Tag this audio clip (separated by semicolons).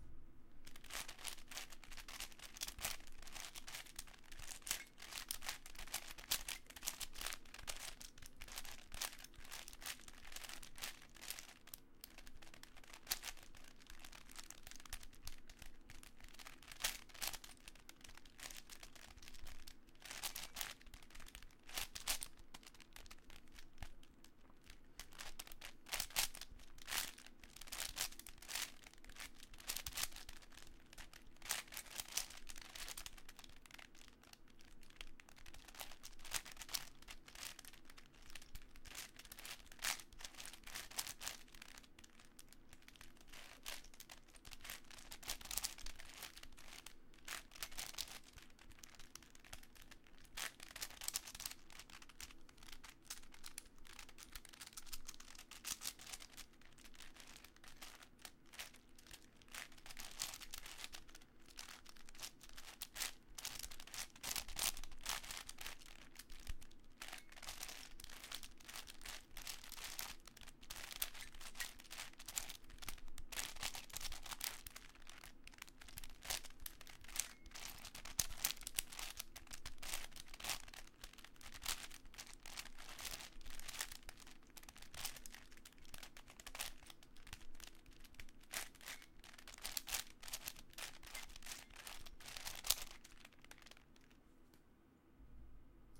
Rubiks; Click; Puzzel; Cube; Crunch; Rubikscube